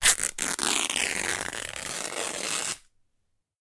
Cloth Rip Even Long
Cloth being torn in one long continuous rip. More variations of cloth ripping sounds can be found in the same sound pack "Cloth"